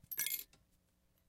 glass piece
Small shard of glass tossed onto more broken glass
Recorded with AKG condenser microphone M-Audio Delta AP
broken-glass, glass